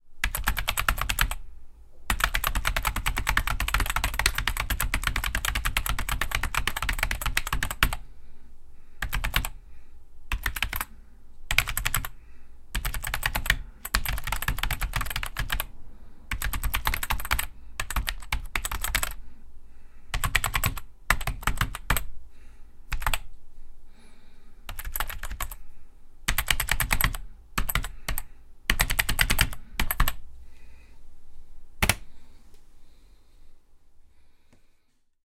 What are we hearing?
Typing on Apple pro keyboard